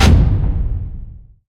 Click Heavy 00
A simple click sound useful for creating a nice tactile experience when selecting menu interfaces, or other kind of buttons.
gamedev, User-Interface, Game, gaming, indiedev, sfx, Click, videogame, games, Menu, Clicks, gamedeveloping, UI, Video-Game, Button, Slide, videogames, indiegamedev